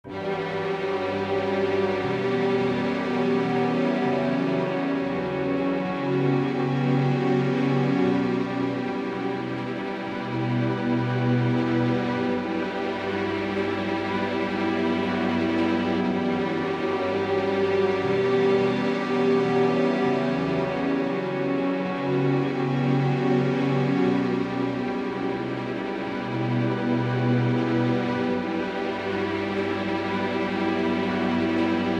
Classical Ambience
Just some chords and stuff to build tension
atmo, Holland, ambience, atmos, atmosphere, atmospheric, classical, white-noise, background, ambiance, soundscape, ambient, general-noise, background-sound